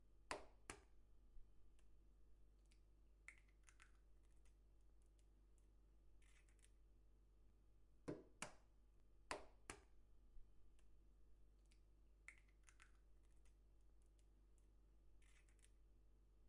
breaking eggs into a container of iron, binaural recording
break, eggs, food, kitchen